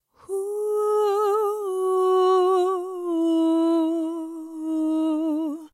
Haunting Descending Scale
Woman singing 4 descending chromatic notes - HAUNTING
Posting a link of where you have used it would be appreciated, too and will get you some social media shares.